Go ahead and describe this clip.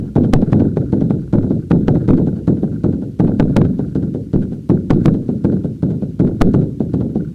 drum-like instrument. Sounds for your toolbox.
bits, fragments, lumps